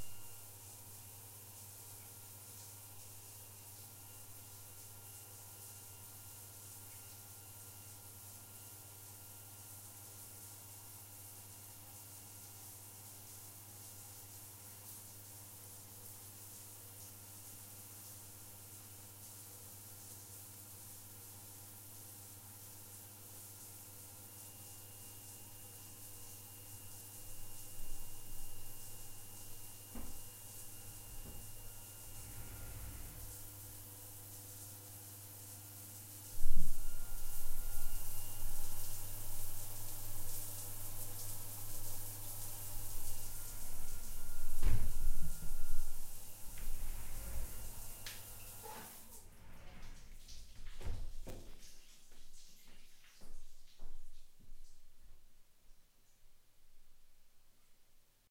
Sink Tap
My sink at home, recorded for a project for uni.
water, field-recording, sink-tap, project, running-water, sE2200a